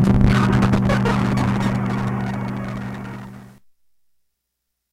Casio CA110 circuit bent and fed into mic input on Mac. Trimmed with Audacity. No effects.